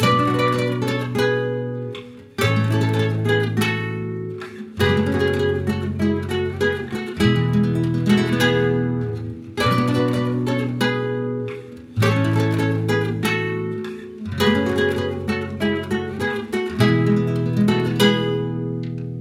Classical Loop Guitar 4 Chords
Here I recorded three guitar parts. In the left and right channels played guitar by thirds and sixths. In the middle played by arpeggio chords, with the sequence: Bm, A, F#m, E. The track is Looped, has a minor español mood. Tempo - 100 bpm. Clean signal, without reverb or another different effect. Used classical acoustic nylon-strings guitar.
You can be using it for your needs, podcasts, samples, different projects, or just like alarm sound on your phone. Enjoy.
sequence, classic